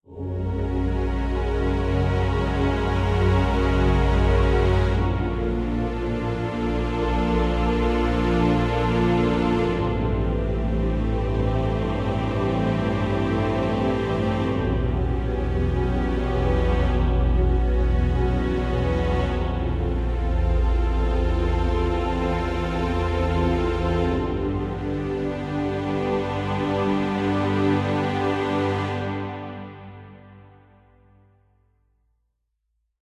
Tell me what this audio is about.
Gates of Heaven Music
Gates of Heaven sounding powerful choir / orchestra music.
choir, classical, epic, gates, heaven, heavenly, orchestral, paradise, powerful, religion, sky, string